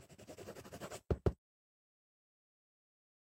Raya lapiz niña
drawing, pen, scribbling